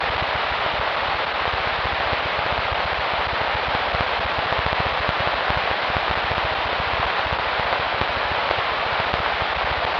old radio noise
10 secs of hard noise and clipping
shortwave, radio, electronic, noise, static